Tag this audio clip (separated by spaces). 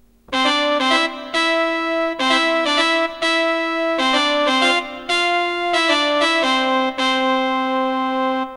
beautiful ceremonial christmas fanfare nice solemn song sound xmas